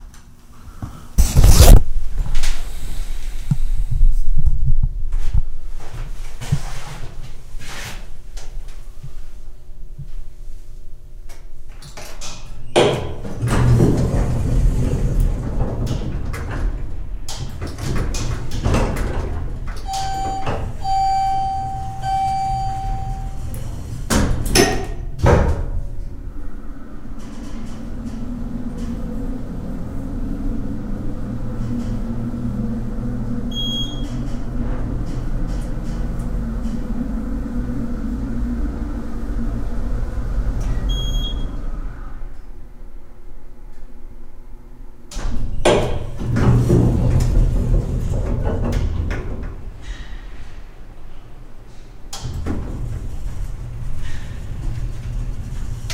Elevator ascending two floors. Minor peaking when the doors open/close. Sound of my breathing. Recorded at Shelby Hall, The University of Alabama, spring 2009.